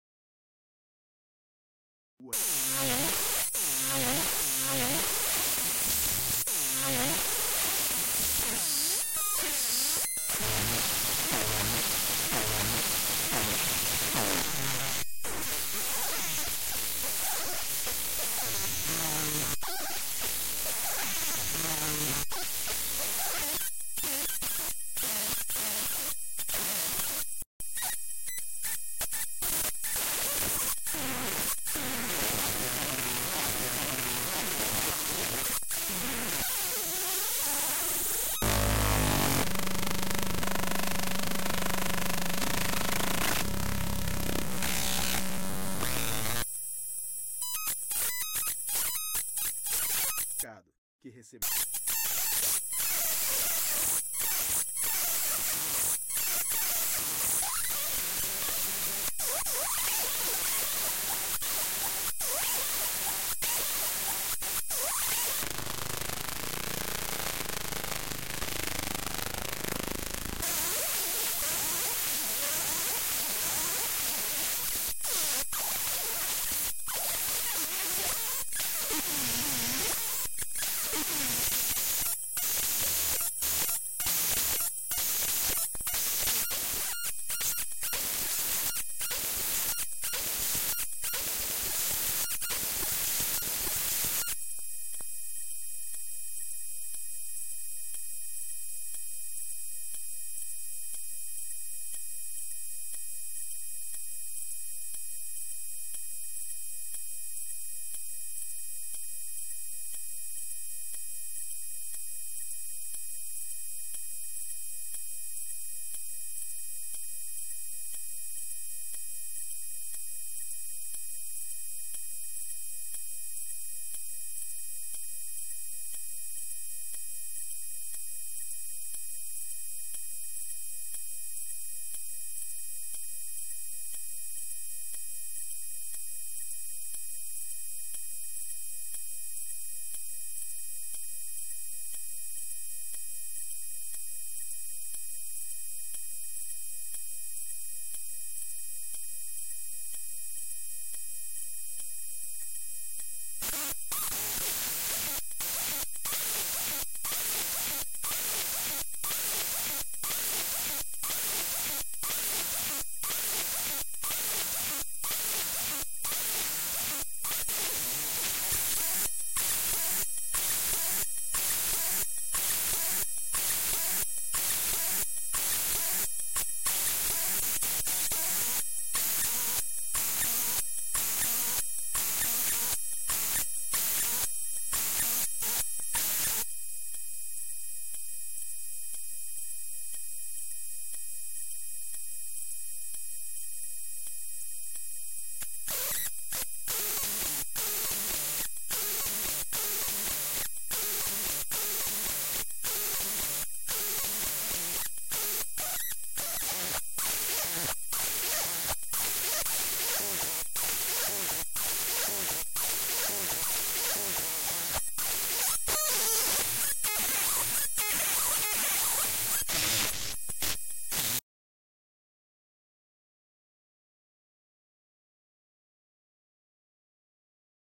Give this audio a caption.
DIGITAL GLITCH
Home-made accidental glitch made by rendering on editing software whilst messing with the source file. DON'T TRY THIS AT HOME